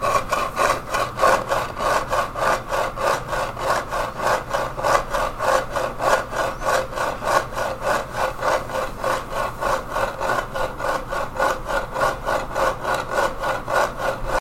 scratching the chipped graphic on a longboard deck
MUS152, scratching, wood